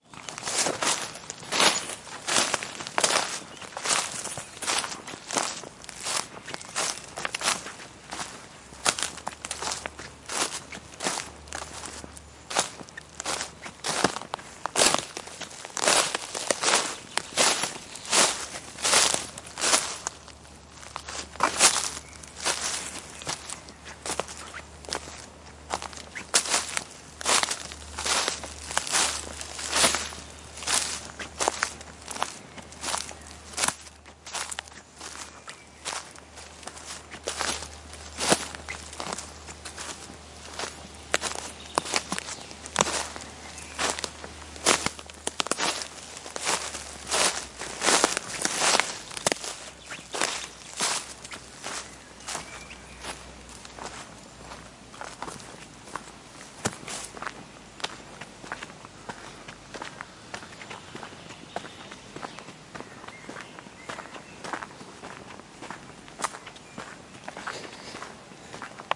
Forrest Birds Walking through leaves and on path Skodsborg06 TBB
Field recordings in a forrest north of Copenhagen, Denmark.
walking, path, birds, forrest, leaves, Rustling, through